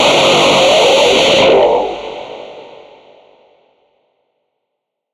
A hard but short kick processed with Slayer2's effects.
hardcore, distortion